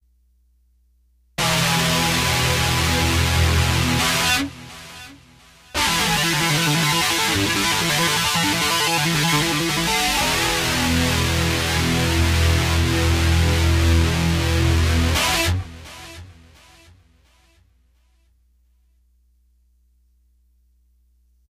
Heavy Hammer
Typical 'hammer' on guitar. Used in many Hendrix tunes, this sound is actually a 'pull-off', starting with a D (open string) and pulling of the E repeatedly. Recorded with a Fender Stratocaster with noiseless pickups, played through a DigiTech 2101 Pro Artist processor.